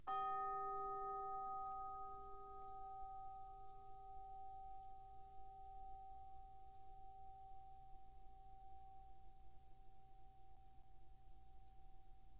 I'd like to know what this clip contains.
chimes d#4 pp 1
Instrument: Orchestral Chimes/Tubular Bells, Chromatic- C3-F4
Note: D#, Octave 2
Volume: Pianissimo (pp)
RR Var: 1
Mic Setup: 6 SM-57's: 4 in Decca Tree (side-stereo pair-side), 2 close
bells, chimes, decca-tree, music, orchestra, sample